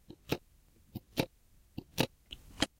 Counter Ticks
Some altered key presses on an old keyboard meant to imitate the counter sound in the background of this game.
I changed their pitch in Audacity.
click, counter, effect, game, key, keyboard, sfx, sound, tick